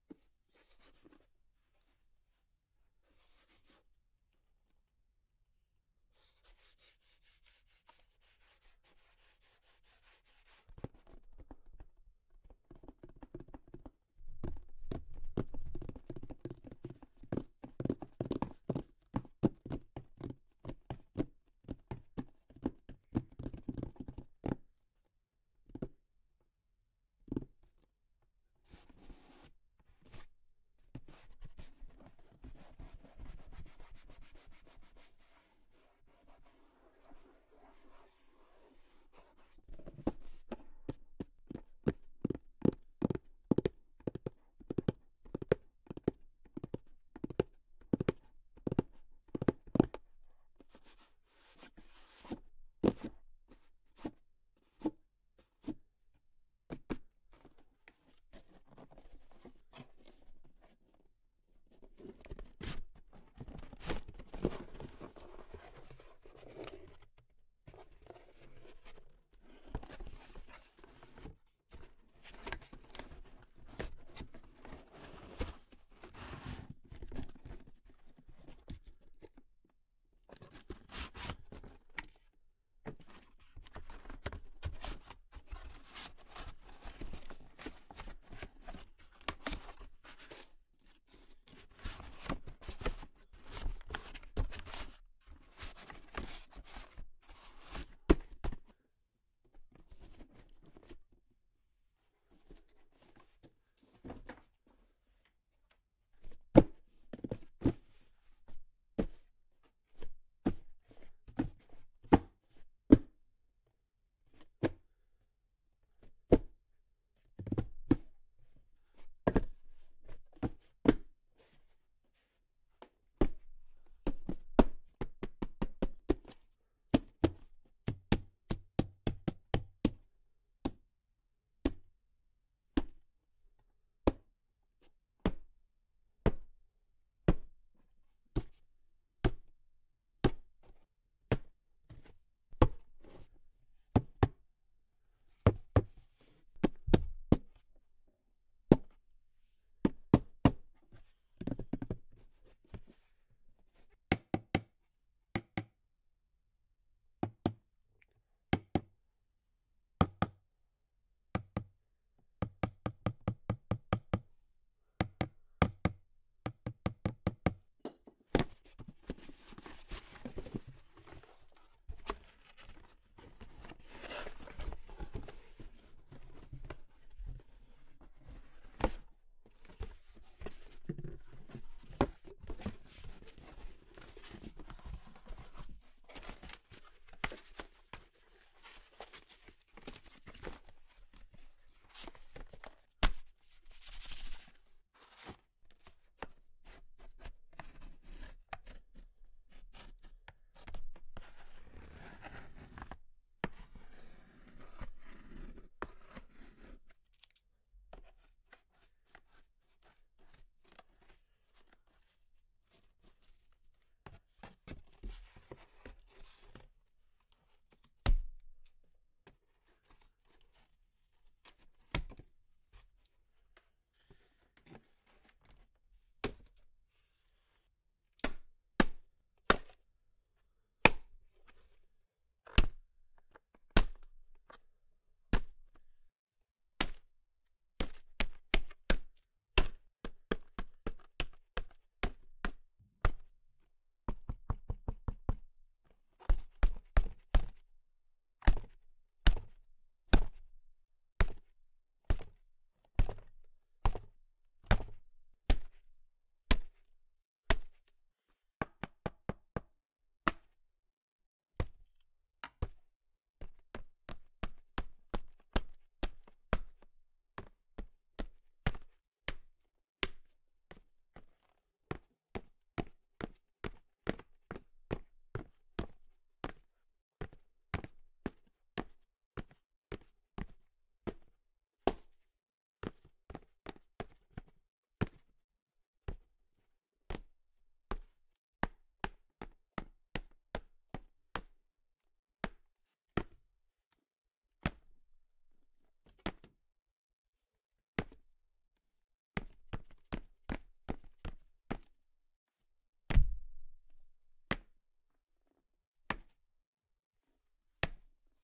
Made some sound effects with a cardboard box! There's some hits, some taps, scissor snips, and more.